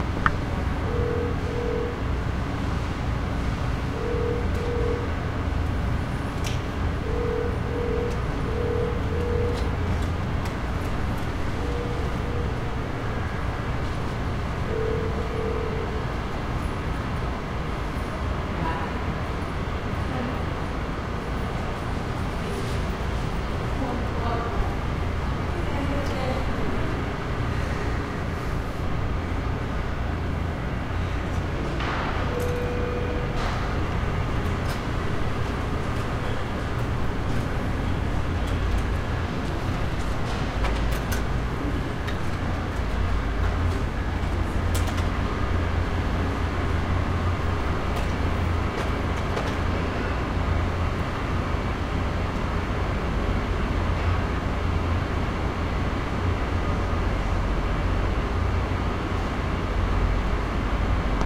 Porter Airline Lobby Int
recorded on a Sony PCM D50
xy pattern
Airline, Porter, Int, Lobby